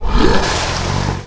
Dragon hurt by sword and pouring buckets of dragon blood.
dragon, groan, hurt, monster, pain, roar, slain